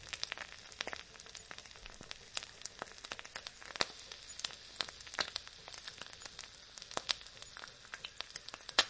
Frying an egg. Recorded using a Rode NT4 into a Sony PCM D50.